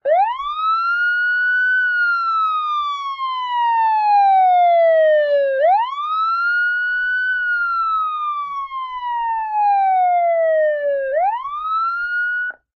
Police Car Siren USA
The dreaded sound of a police car before you get pulled over. Recorded on an iPad using an Audio Technica boom mic.
Getting-pulled-over, Police-pull-Over, Police-Car, Police-Siren